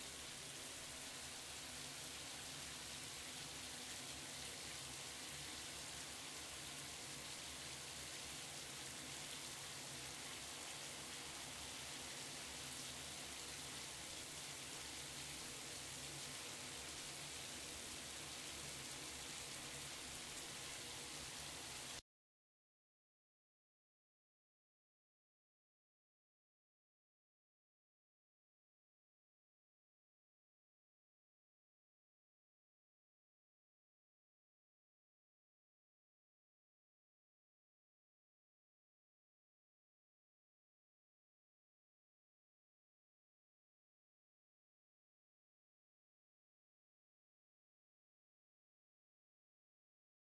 untitled shower 2
field-recording, shower, water